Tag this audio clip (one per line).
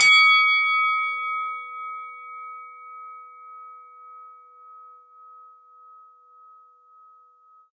pugilism
boxing
fight
single
round
mono
bell
bout
ding